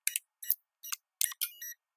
digi code door uncatch
This is the sound of a code being entered into digital keypad for a locked door, then the door being opened. The door separates the outside from the inside. Nice sample with quality high frequencies.
keypad, click, lock, digital, code, beep, door, field-recording